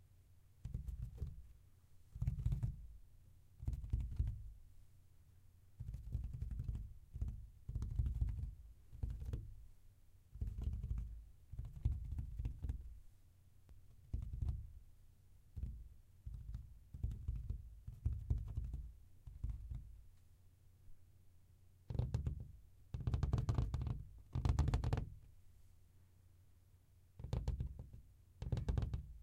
Small Creature Scamper on Wall
Small creature scamper/skitter sounds. Performed using my fingertips on a dry wall.